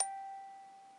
Recorded on an iPad from a musical box played very slowly to get a single note. Then topped and tailed in Audacity.